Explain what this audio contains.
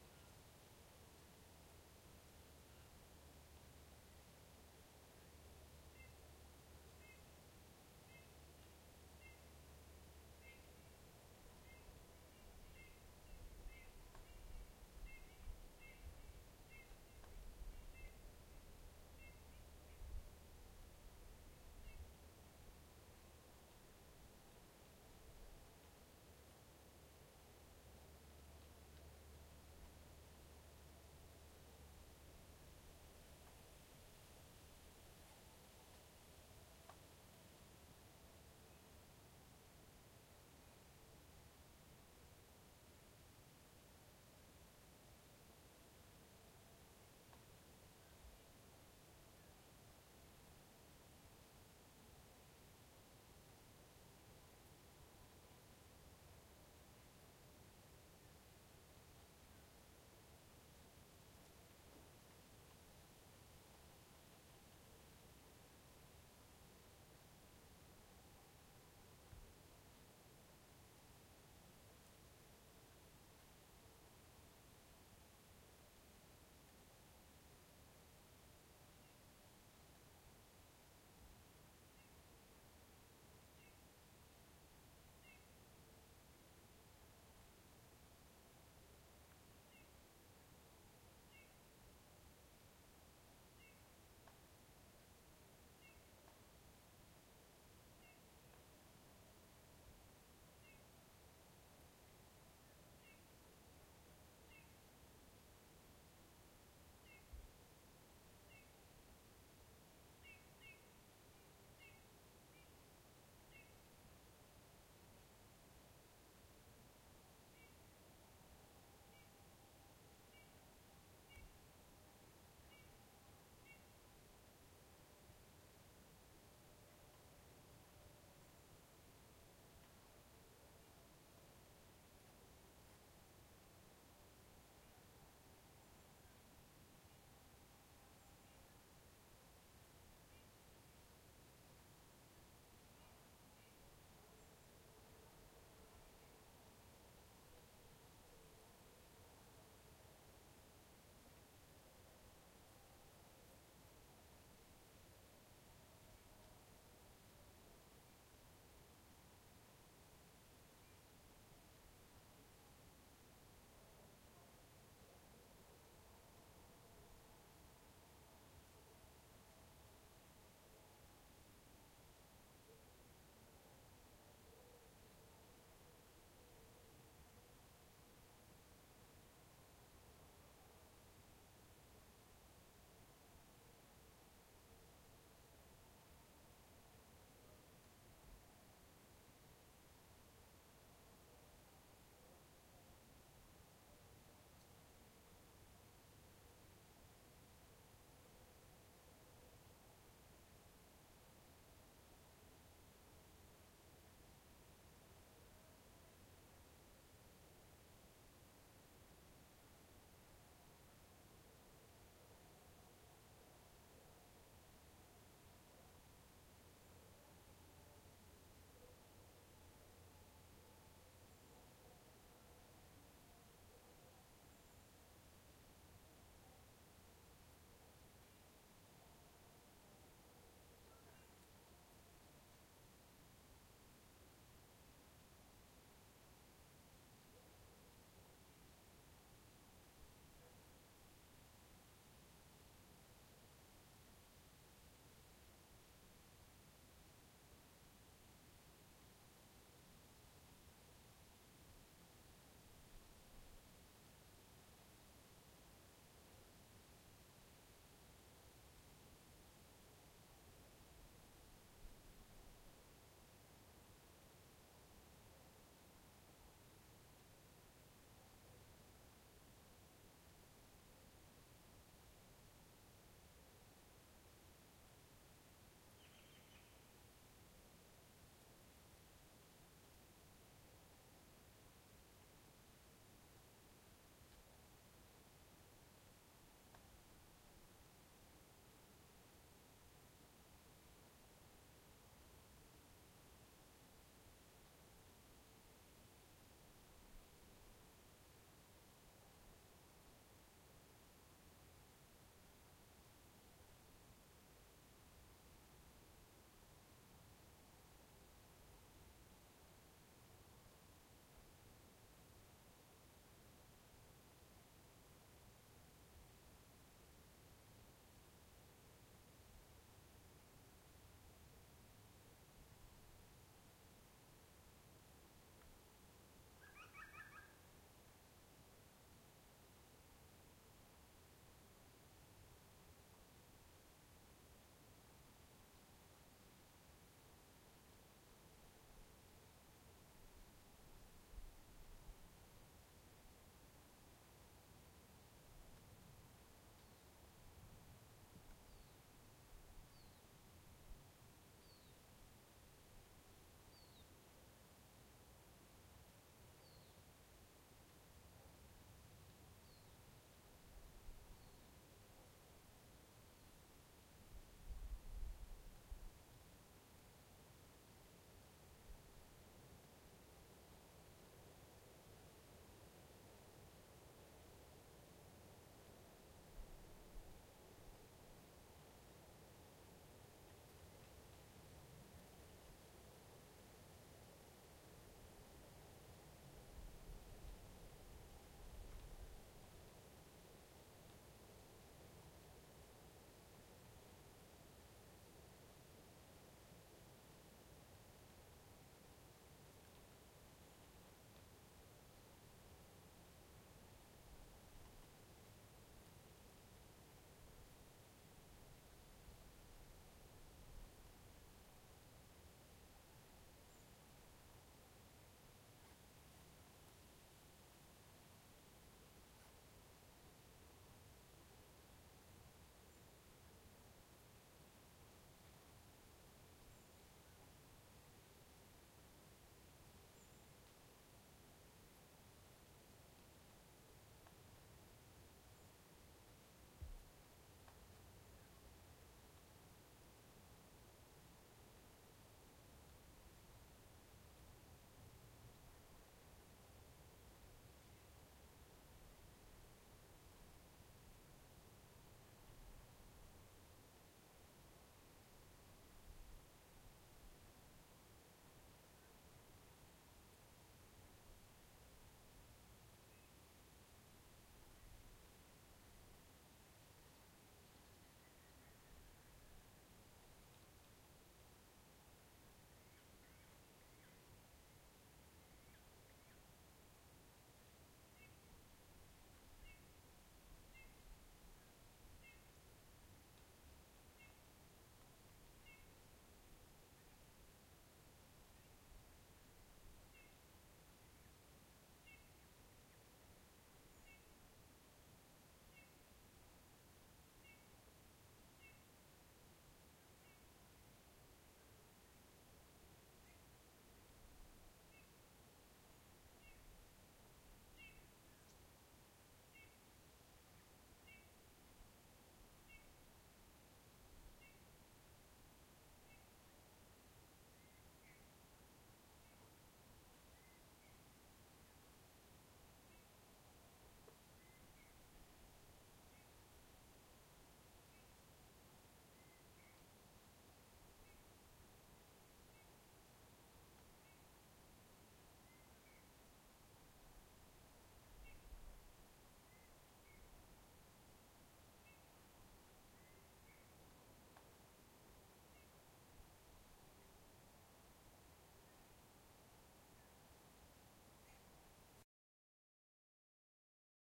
Forest 11(birds, wind)
forest, nature